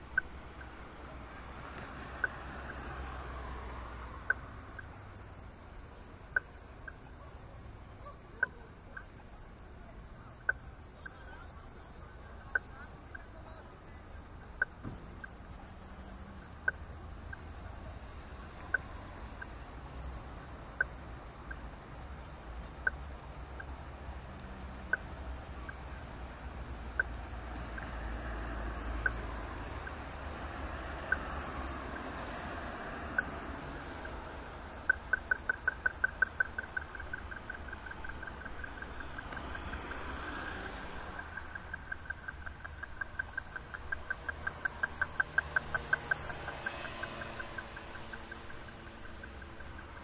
080808 37 Road Pedestrians TrafficLight AudioSign
trafficlight with audio signal are green
audiosign, pedestrians, road, trafficlight